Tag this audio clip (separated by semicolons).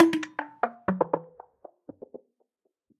loop; 120-bpm; rhythmic; delay; metallic; percussion-loop; percussion; rhythm